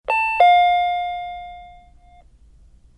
"Electric Eye" entry chime, suitable for any convenience store, liquor store, dry cleaner's storefront, or any similar strip-mall small retail shop.
I was working on a project, and needed a suitable sound for a 'convenience store' style entry chime. Walk through the light beam, and the chime rings out to let the person in the back know that a customer has come in. Since these systems in real life come from probably hundreds of different manufacturers, it's hard to pin down exactly "the sound".. especially since there's so many.
This sound hits all the right notes for what I think of, when I think of going to a mom-and-pop convenience store.
Sound is available in three versions:
Based on "Door Chime 3" by Taira Komori